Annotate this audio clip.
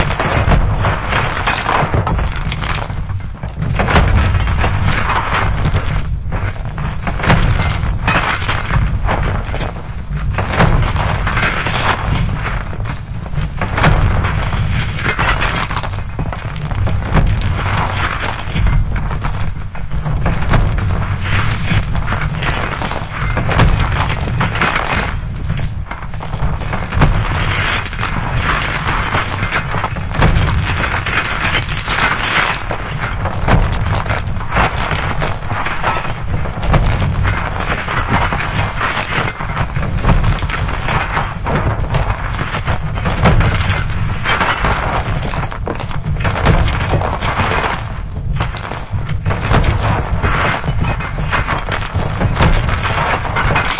Mining Machine Work Fantasy
Ambient, Fantasy, Machine, Machinery, Mechanical, Mine, Mining, Motion, rock, Sci-fi, SFX, Spaceship, Stones, strange, Synthetic, unusual, Walk, Work